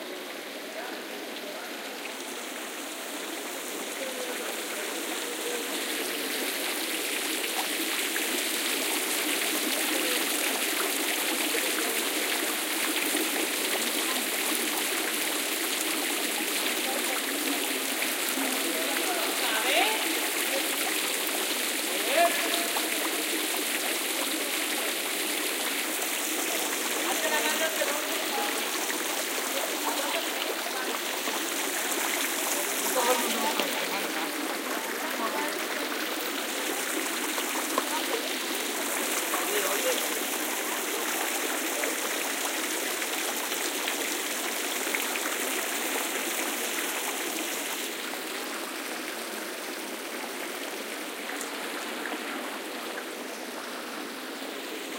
fountain.plvr
sound of water falling from a large fountain, some voices in background / agua cayendo de una fuente grande, algunas voces al fondo
binaural, field-recording, fountain, voice, water